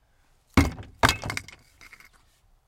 Breaking lock
a shovel breaking a metal lock